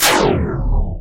Laser Shot Small 2
Small laser gun shot.
action, retro, classic, small, spaceship, shooting, shot